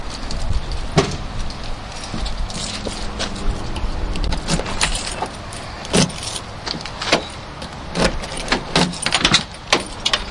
Getting into the car and heading to the Busch Wildlife Sanctuary recorded with Olympus DS-40.